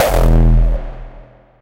a good kick for euphoric hardstyle.
bass, harstyle